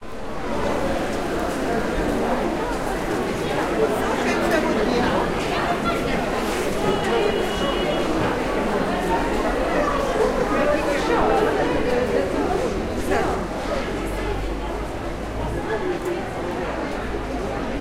Traditional Farmers market in middle fall season in small town in produce section.